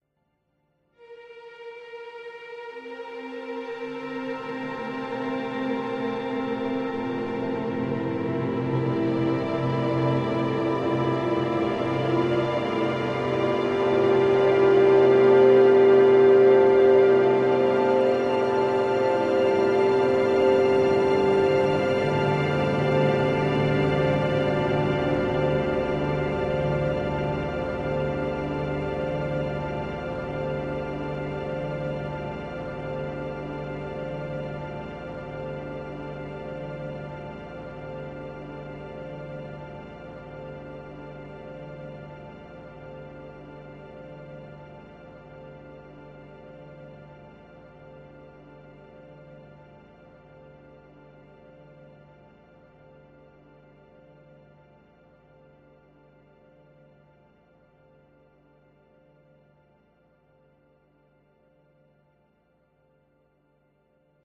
The Ancient Manuscripts
This is a short stab of music that I think sounds a bit like the shimmering strings often found at the edges of an Elder Scrolls background theme. I would try to compose more but I don't have that kind of talent of really know what I'm doing.
ambience, atmosphere, atmospheric, background, drama, Elder-Scrolls, film, game, game-music, incidental-music, moody, Morrowind, music, Oblivion, roleplaying, rpg, Skyrim, theme, videogame